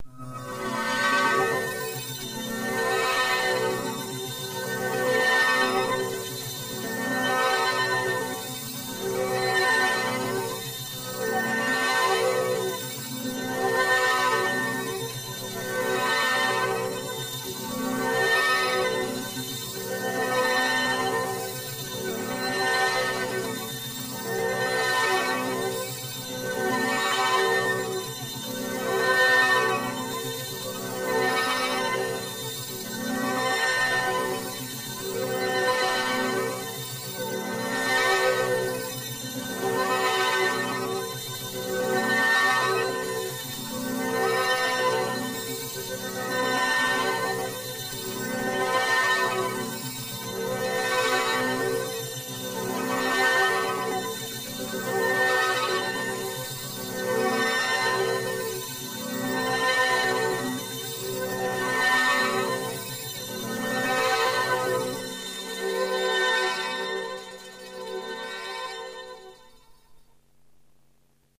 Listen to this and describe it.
1 of 5 hypnotic drones. About 1 minute long each,quite loopable drones / riffs for all discerning dream sequences, acid trips and nuclear aftermaths.